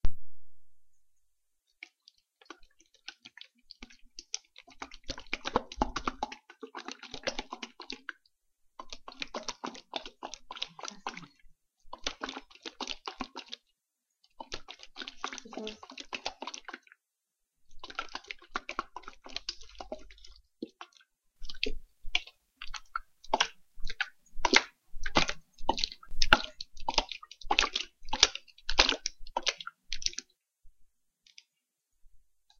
This sound sounds as a jar of pickles being moved around. Hope you find it useful. Glad to contribute with this.
bouncing, pickles